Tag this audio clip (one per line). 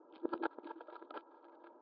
helicopter
field-recording
blades